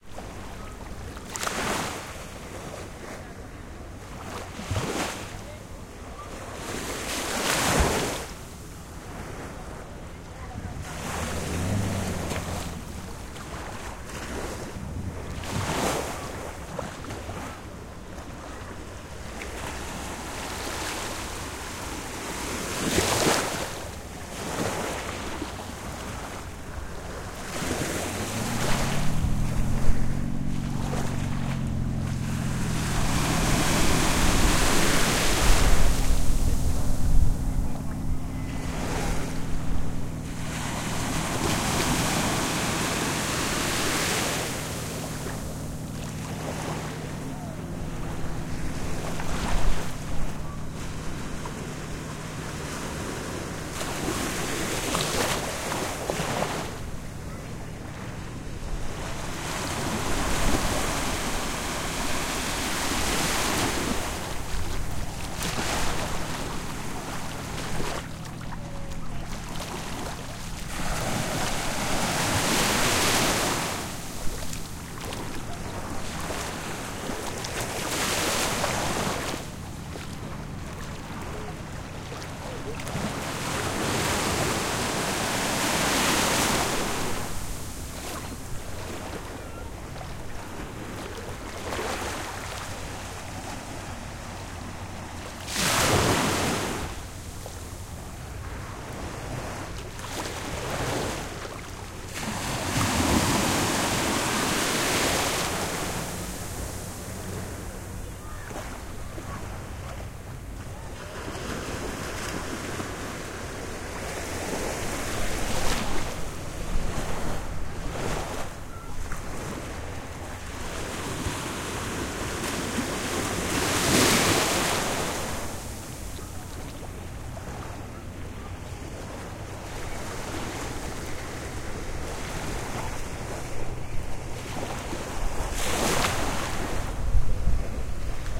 Daytime on the Seven Mile Beach in Negril, Jamaica. Small waves break, there is a powerboat passing.